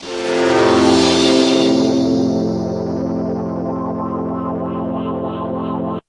Wobble Slicer F3
Wobble Slicer Pad
Pad, Shiver